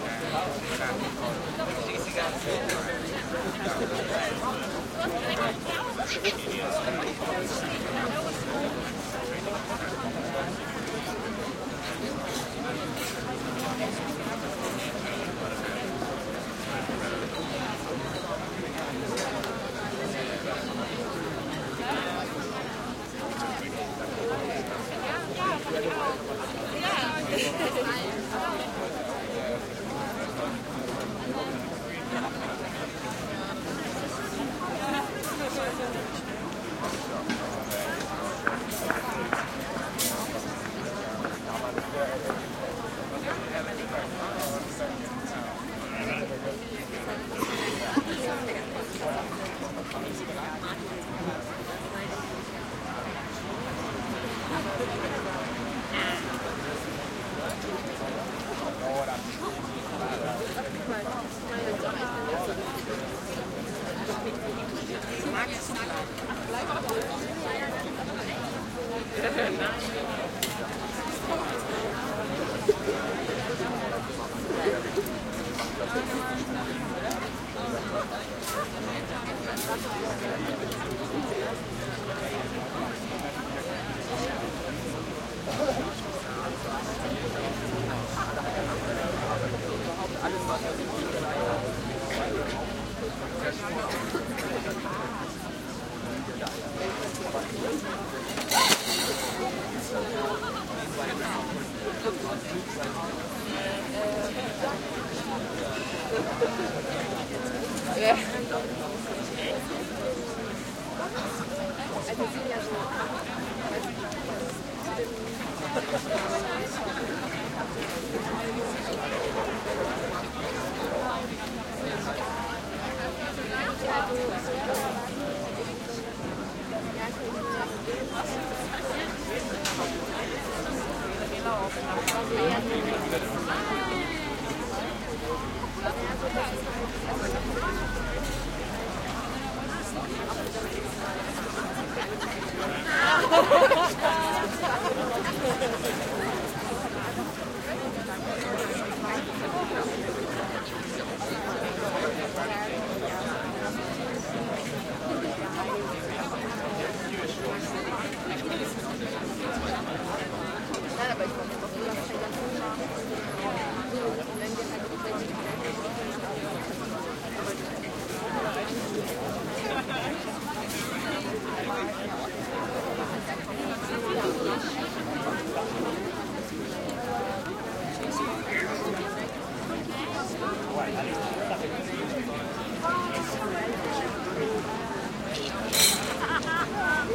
outdoor community festival crowd ext medium active walla after meal time in crowd close german and english voices and activity
medium, festival, ext, walla, crowd, community, outdoor